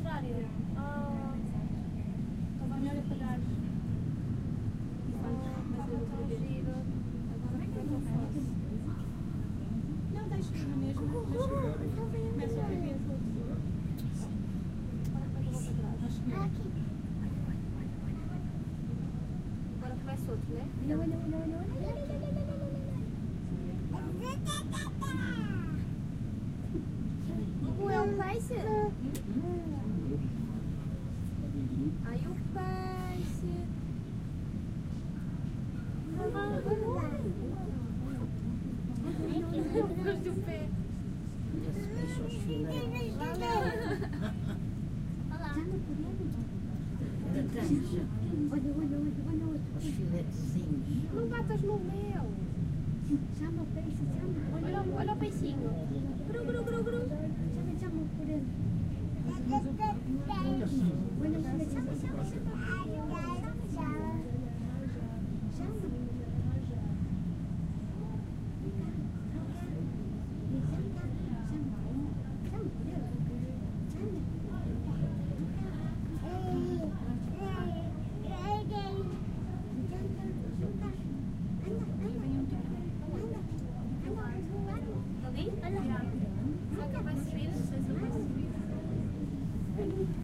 In aquarium
voice, audience, people, talking.
aquarium, Lisbon, Recorded, this, voices